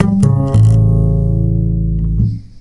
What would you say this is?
GMaj string chord
cinematic, ensemble, orchestra, orchestral, strings